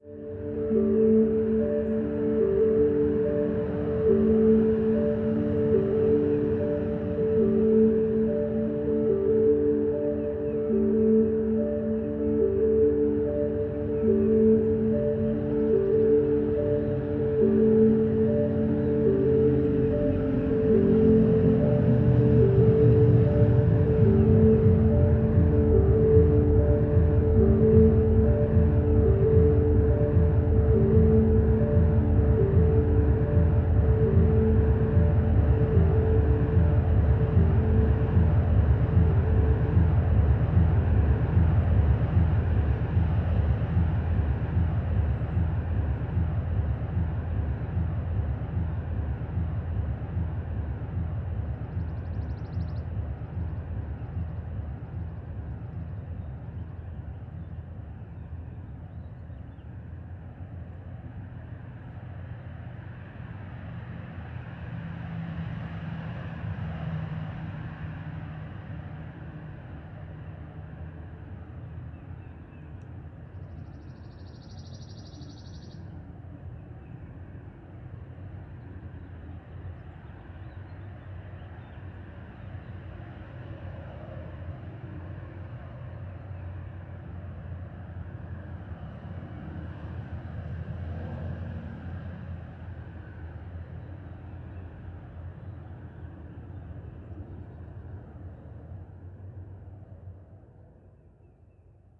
I looped some notes from a church organ I had with a live loop pedal and a not so good radio shack mic. In the second input I recorded the street/cars outside my house with a 40 year old shure condenser mic. I think did some delay effects on the cars passing by.
outside, street, church, organ, delay, loop, cars